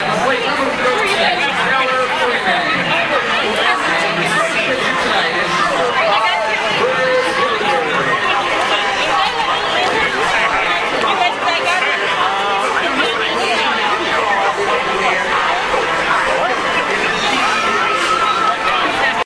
Field recording of crowd noise at a Cyclones game.